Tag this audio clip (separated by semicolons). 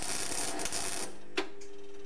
electricity; mig-welder; spark